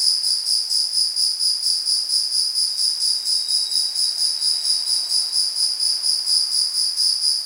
Cricket chirping away in a public washroom. Natural hard room reverb. Later edited into a loop and normalized.